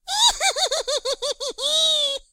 Evil Laugh 1
cackle, demented, evil, halloween, laugh, maniacal